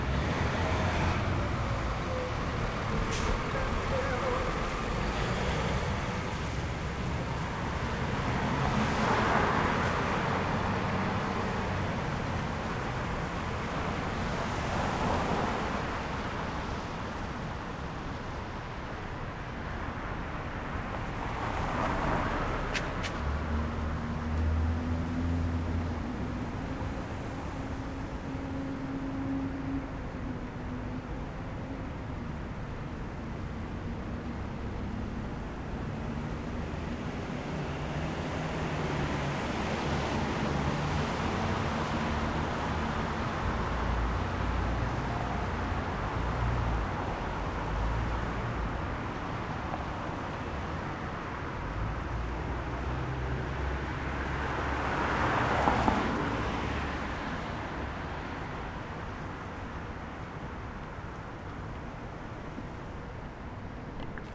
A large truck drives by in the city